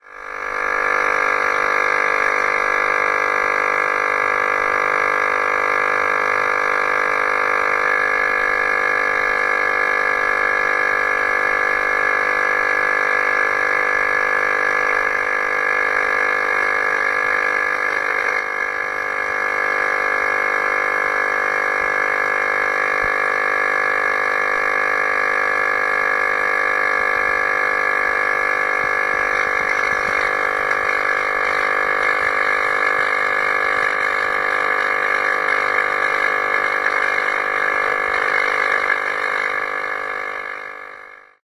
buzzing homephone070710
07.07.2010: about 23.30 on the Gorna Wilda 76 street in Poznan/Poland. the buzz of the home-phone.
buzz, buzzing, homephone, night, noise, poland, poznan, wilda